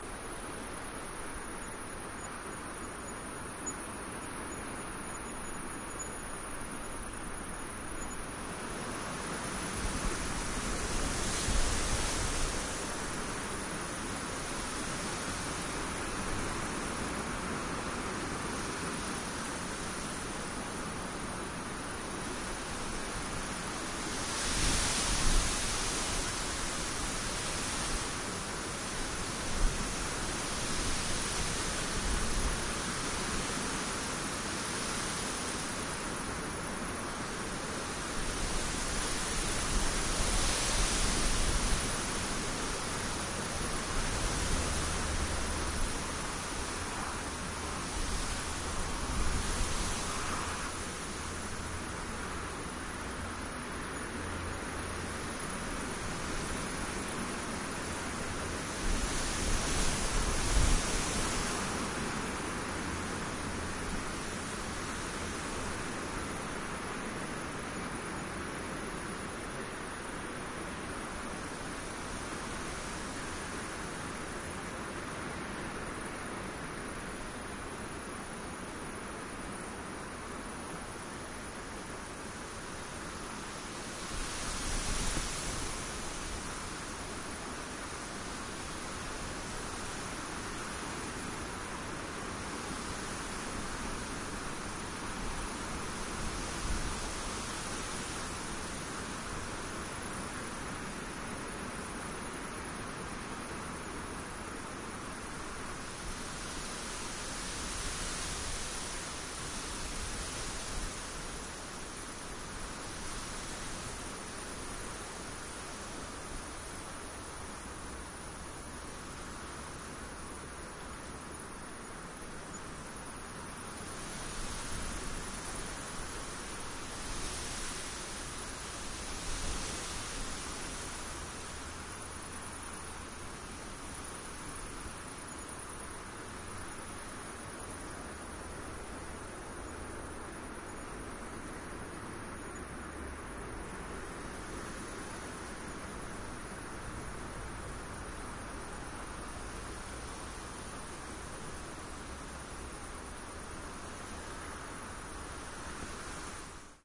Large ambiance in fields. dry grass moved by "mistral" wind. Crickets. some traffic noise sometimes. From various field recordings during a shooting in France, Aubagne near Marseille. We call "Mistral" this typical strong wind blowing in this area. Hot in summer, it's really cold in winter.
gusts, grass, mistral, insects, crickets, wind, dry